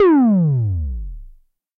Arturia Drumbrute Analogue Drums sampled and compressed through Joe Meek C2 Optical Compressor